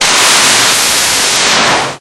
gau-12 equalizer burst 1 no echo
agression, army, attack, canon, fight, military, patrone, pistol, rifle, schuss, shot, sniper, war, weapopn